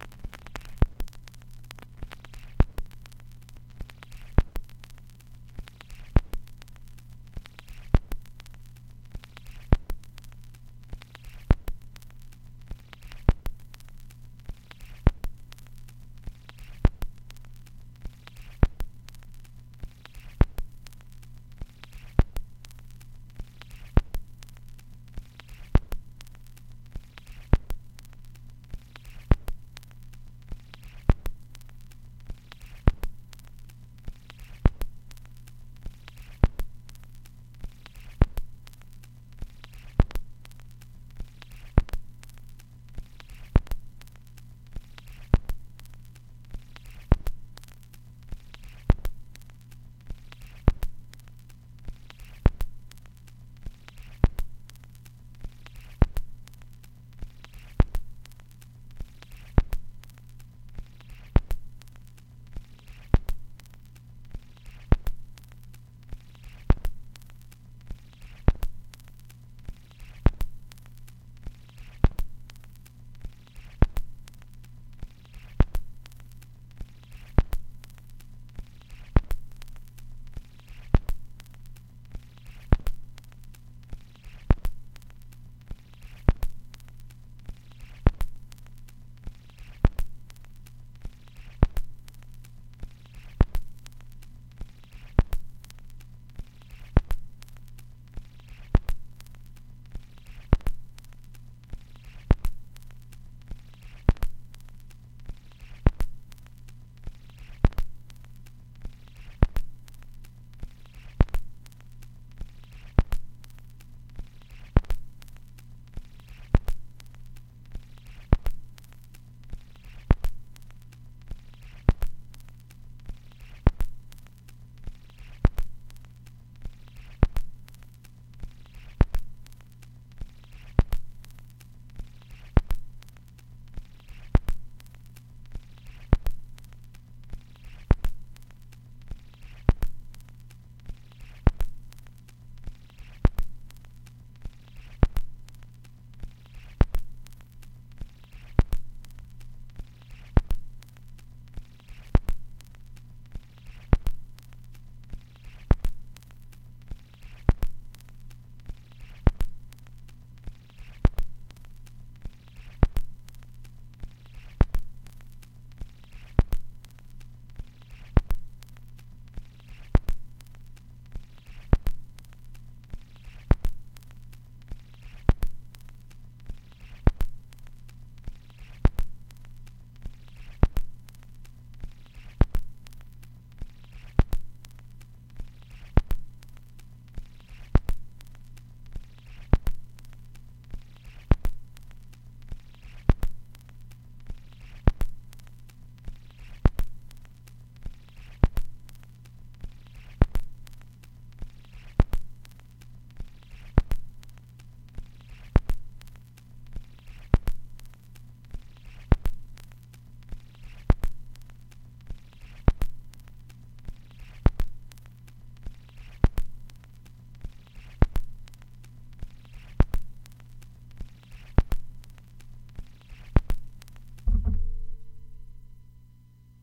superlong staticRSWTNY
Snippets of digitized vinyl records recorded via USB. Those with IR in the names are or contain impulse response. Some may need editing or may not if you are experimenting. Some are looped some are not. All are taken from unofficial vintage vinyl at least as old as the early 1980's and beyond.
LP, vinyl, vintage, turntable, lofi, album, retro, crackle, surface-noise, noise, record